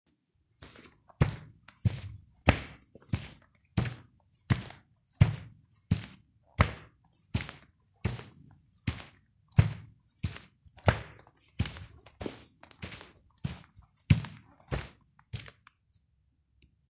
Footsteps - Carpet
Boots on carpet
shoes, carpet, walking, footsteps, walk, floor, heavy, boots, steps, foley